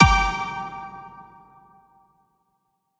Tonal Impact G
Tonal impact hitting the note G.
tonal, alternative, drone, E-minor, impact, layered, hip-hop, electro, ableton